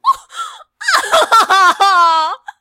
reacting to something cute